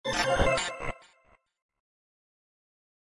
I used FL Studio 11 to create this effect, I filter the sound with Gross Beat plugins.
computer, digital, electric, fx, game, lo-fi, robotic, sound-design, sound-effect